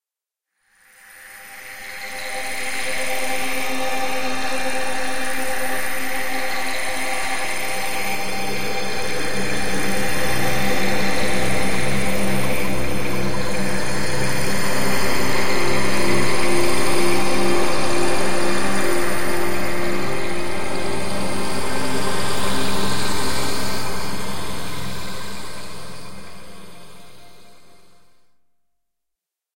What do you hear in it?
sci-fi4
made with vst instruments